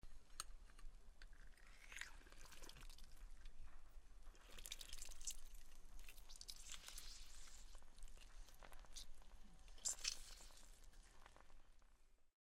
lemon squeezed
Lemon being squeezed over a sink.
food, kitchen, lemon, sink, squash, squeezed, squish